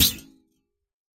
BS Hit 14
metallic effects using a bench vise fixed sawblade and some tools to hit, bend, manipulate.
Bounce, Clunk, Dash, Effect, Hit, Hits, Metal, Sawblade, Sound, Thud